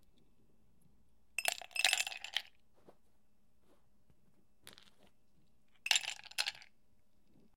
Ice Cubes Dropped in a Glass
Dropping ice cubes in a glass.
a, cubes, cup, dropped, dropping, glass, ice, water